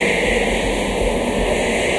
convoluted back to back loop 60 bpm 2g
This is loop 15 in a series of 40 loops that belong together. They all have a deep dubspace feel at 60 bpm and belong to the "Convoloops pack 01 - back to back dubspace 60 bpm" sample pack. They all have the same name: "convoluted back to back loop 60 bpm"
with a number and letter suffix (1a till 5h). Each group with the same
number but with different letters are based on the same sounds and
feel. The most rhythmic ones are these with suffix a till d and these
with e till h are more effects. They were created using the microtonik VSTi.
I took the back to back preset and convoluted it with some variations
of itself. After this process I added some more convolution with
another SIR, a resonator effect from MHC, and some more character with (you never guess it) the excellent Character plugin from my TC powercore firewire. All this was done within Cubase SX.
After that I mastered these loops within Wavelab using several plugins:
fades, equalising, multiband compressing, limiting & dither.
60-bpm, dubspace, space